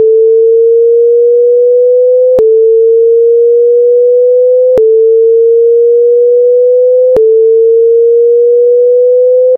Version of Alarm Number One looped four times. See Alarm Number One.
Created with: Audacity
alarm, siren